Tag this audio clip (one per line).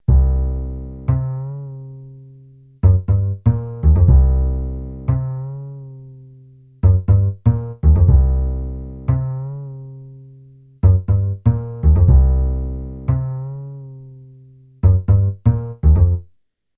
bass-loop
bassloop